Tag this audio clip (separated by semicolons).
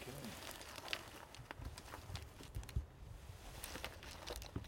clothing; pockets; shooting